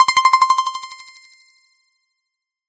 Blip Random: C2 note, random short blip sounds from Synplant. Sampled into Ableton as atonal as possible with a bit of effects, compression using PSP Compressor2 and PSP Warmer. Random seeds in Synplant, and very little other effects used. Crazy sounds is what I do.
110, acid, blip, bounce, bpm, club, dance, dark, effect, electro, electronic, glitch, glitch-hop, hardcore, house, lead, noise, porn-core, processed, random, rave, resonance, sci-fi, sound, synth, synthesizer, techno, trance